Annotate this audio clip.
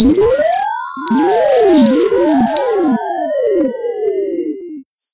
fastwalker shortwave tones 01
Just a very simple experiment I performed in Audacity. I was messing around with tone generated "chirps". I duplicated a single chirp, multiplied it and added additional sound effects to give it a 1950s science fiction shortwave type of sound.
I will be uploading more sounds as I am able to.
Have fun out there in audio land!
Best Wishes!
Massachusetts, square, 02157, wave, fiction, sci-fi, shortwave, science, noise